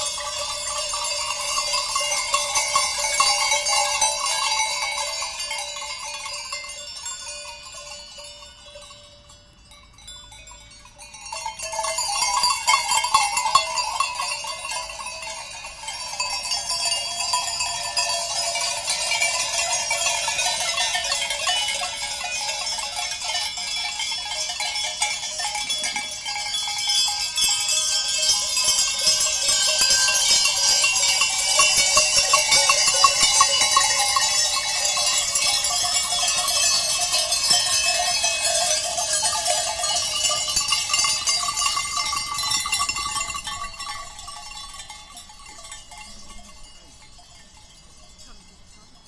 Flock of Sheep -Nov. 2011- Recorded with Zoom H2
field-recording, Sheep, Farm, Lamb, bleat, countryside, Switzerland, Flock, environmental-sounds, bell